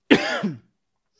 Male cough
Ponce, Puerto Rico; Daniel Alvarez.